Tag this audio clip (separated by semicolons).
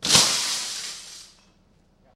broken; dropped; explosion; field-recording; glass; loud; pane; smash; window